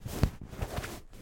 Cloth, Bedding, brush, sweep, back and forth-011
The sound of a blanket or sheet being moved tumbled or manipulated
bedding, brush, cloth, sweep